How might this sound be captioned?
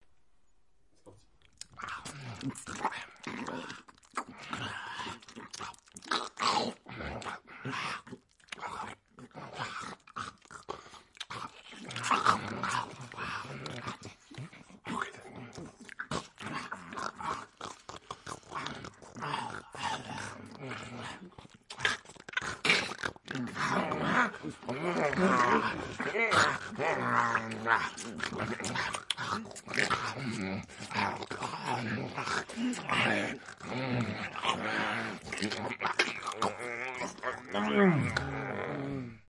3 zombies eating
flesh, zombie, eat